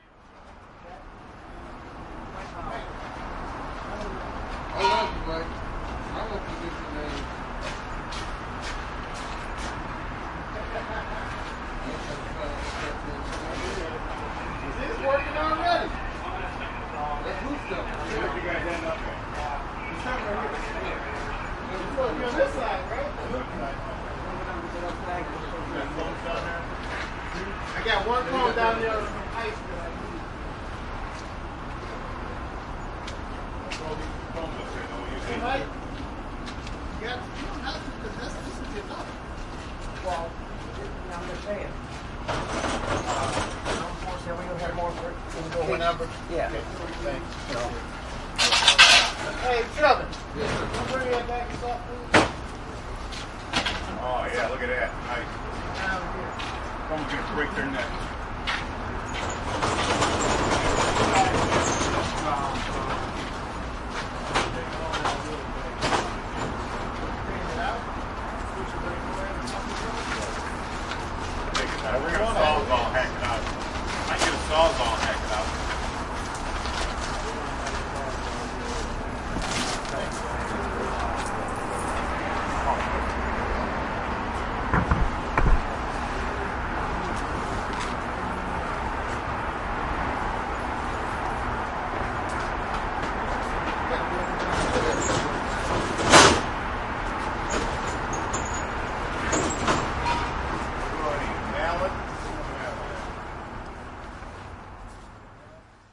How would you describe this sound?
university circle
construction at a train station